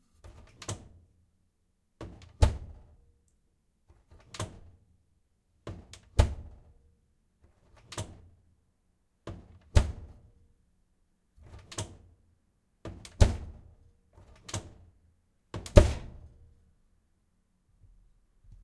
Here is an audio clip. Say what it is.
Multiple takes on opening and closing an oven door.
A pair of Sennheiser ME64s into a Tascam DR40.
close, closing, door, open, opening, oven